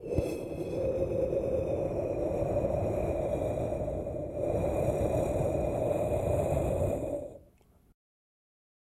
Wind Arctic Storm Breeze-009
Winter is coming and so i created some cold winterbreeze sounds. It's getting cold in here!
Arctic, Breeze, Storm, Wind, Windy